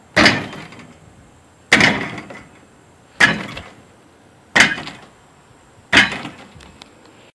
Recorded this using Samsung Galaxy S3. It is me hitting a rim with a basketball a few times. Use it for whatever you want.